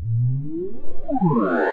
Various samples morphed with FL Studio's granulizer.